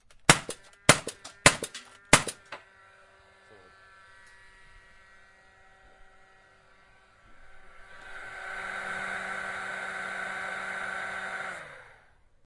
nail gun shooting 4 quick shots into open space, with motor left to idle.